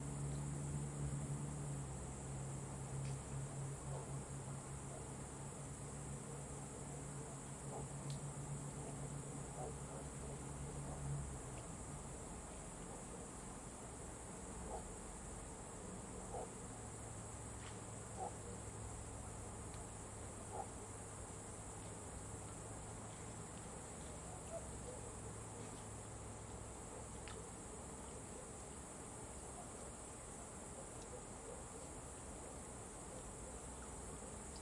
Cicadas on Polish Rural near the river.
Recorded with Zoom H2n
Do you like my work? Buy me a coffee 🌟